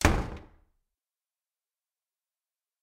House Door Closing Interior
House door closing.
close, doors, open, wooden, door, opening, house, shut, closing